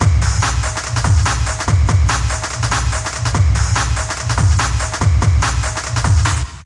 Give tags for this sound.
Hardbass Loops

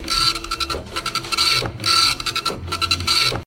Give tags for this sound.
printer effect ambient printer-loop

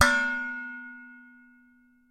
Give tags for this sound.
bang kitchen pot metal lid hit

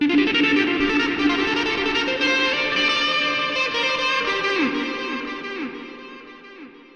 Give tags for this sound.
electronic music processed

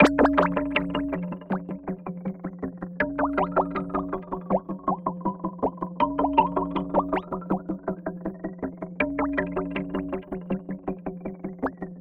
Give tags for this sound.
wet arp2600 sequence arp hardware synth noise seq synthesizer analog

